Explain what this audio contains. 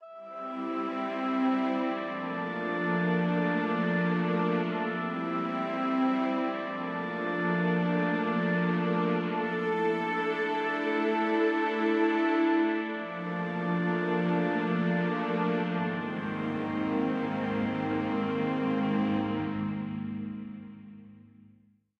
Peaceful Ambiance Theme
A short but sweet peaceful classical ambiance theme.
Hifi, suitable for professional use.
Trivia: Originally composed for demo tapes for a British short film.